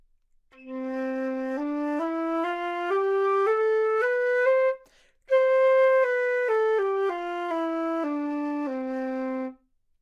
Flute - C major - legato-bad-tempo
Part of the Good-sounds dataset of monophonic instrumental sounds.
instrument::flute
note::C
good-sounds-id::7017
mode::major
Intentionally played as an example of legato-bad-tempo
Cmajor, good-sounds, neumann-U87, scale